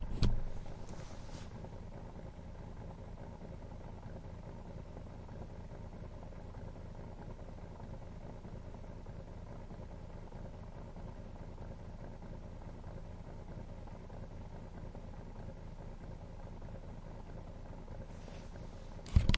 Empty running sound
Gramophone running sound with no record on.
shellac, antique, Playback-rate, antique-audio, Gramophone, mechanical-instrument, End-scratch, record